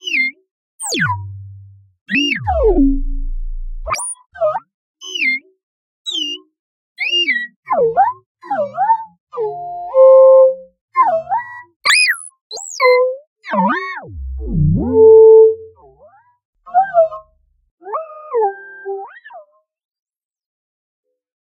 Robot sound fx.
strange, machine, digital, Robot, electronics, noise, electronic, wall-e, sci-fi, mechanical, sound-design, future, fx, weird, artificial